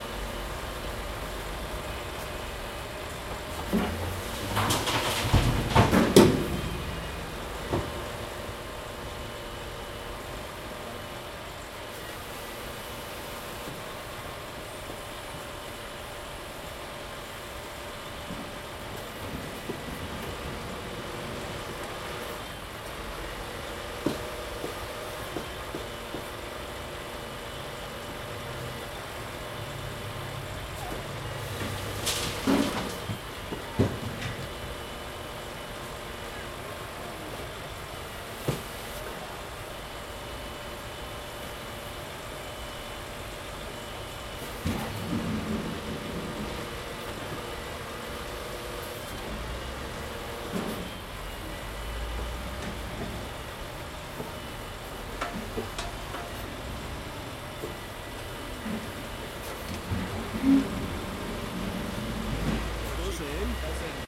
Road Works in Swiss Cottage, London
Recording of Road Works being done in Swiss Cottage, London on the Finchley Road.
concrete, construction, construction-site, cottage, demolition, drill, equipment, finchley, jack-hammer, jackhammer, london, pneumatic, road, roadworks, site, swiss, swiss-cottage, tools, works